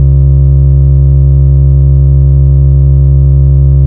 om7cord2
136,1 Hz and 68,05 Hz chord
You sound amazing.
1, sinus, frequency, om, 136, Hz